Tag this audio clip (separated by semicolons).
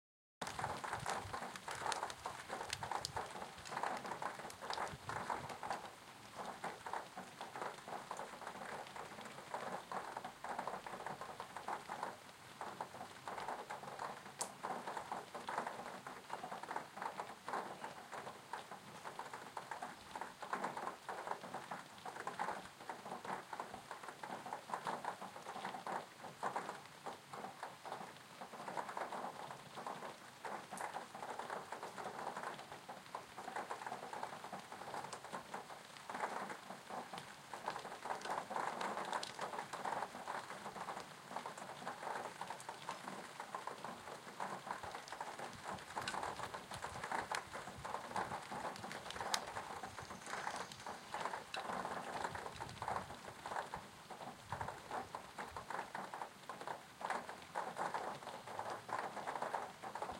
Rain; rainy; days; falls; raining; window